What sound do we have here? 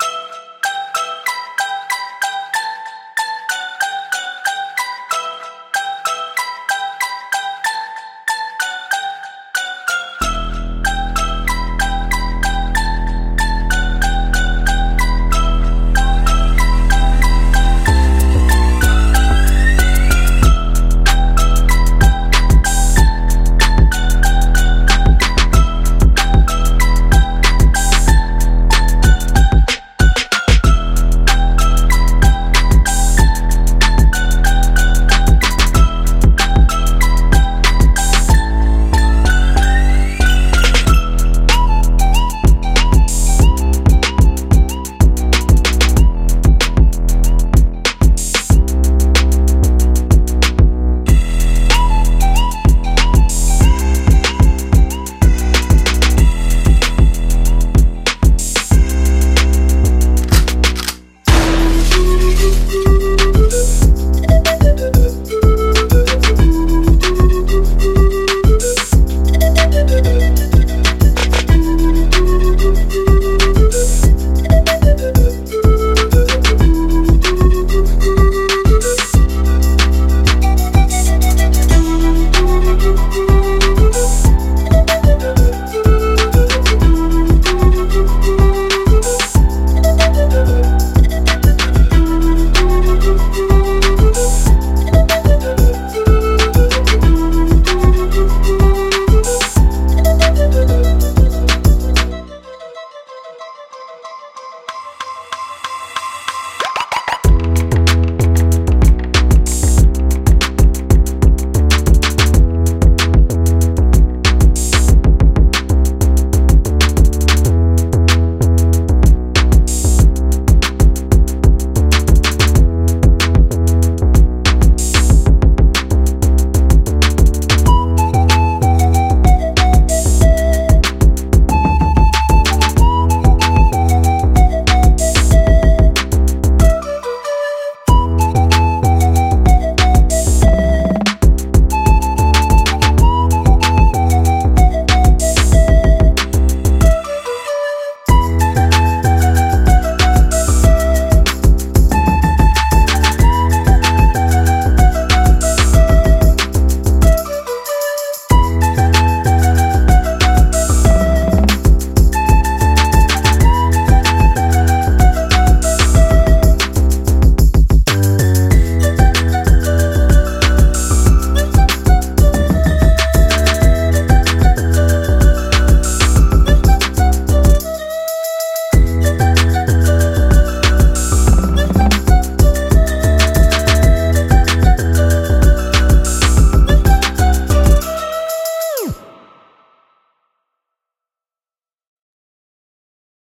flutey loops
the intro arp sound is the tram bell, the pan flute used throughout is spliced from three different sounds, the 808ish bass is a processed sine wave, and the taiko rim and shotgun sounds are used as transition effects.
enjoy!
music, instrumental, bells, loop, 90bpm, flute, drum, drums, pan-flute, hip-hop, gun-shot, trap, beat